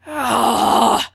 another angry growl